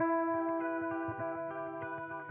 electric guitar certainly not the best sample, by can save your life.
electric, guitar